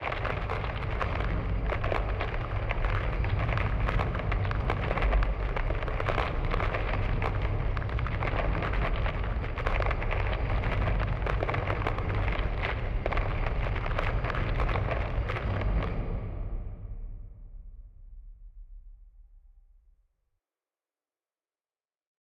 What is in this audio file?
Earthquake in cave
Layered some sounds and used granular synthesis to create a Earthquake sound effect